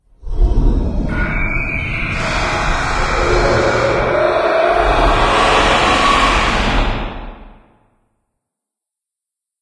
The Roar of a 5-Headed Dragon
The terrifying battle cry of an enormous inhuman 5 headed beast.
Created using these sounds: